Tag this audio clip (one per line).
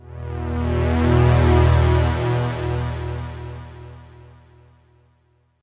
Juno-60
effect
synth
80s
sci-fi
pulse